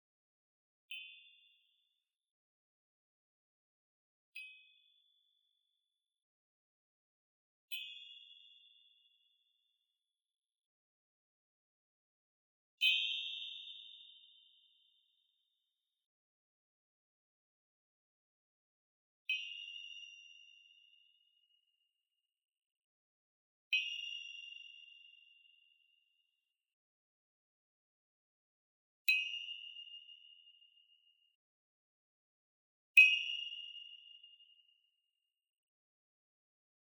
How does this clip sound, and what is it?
Heatsink Ping 01
Small aluminum heat sink plinked with finger nail. Some of them are cut off early, sorry about that.
CAD E100S > Marantz PMD661